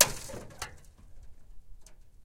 Somewhere in the fields in belgium we found a big container with a layer of ice. We broke the ice and recorded the cracking sounds. This is one of a pack of isolated crack sounds, very percussive in nature.